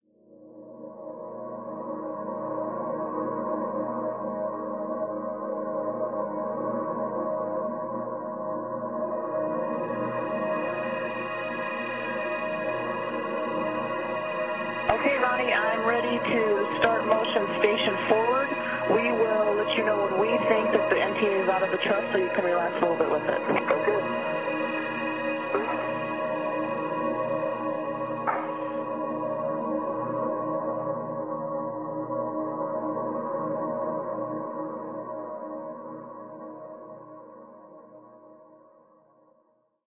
Dark Space Atmosphere
fx, noise, sound